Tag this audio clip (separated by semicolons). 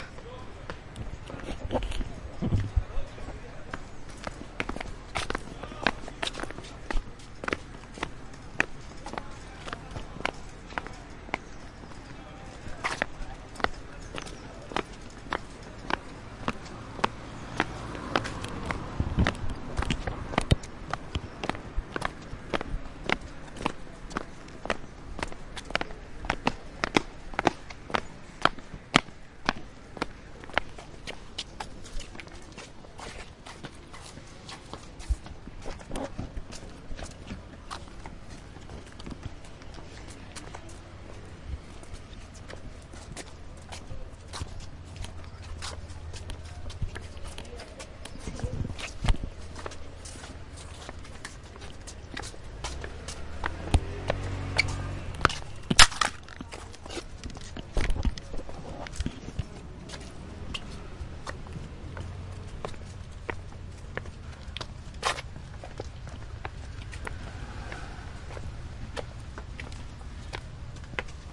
Complex; Humans; Walking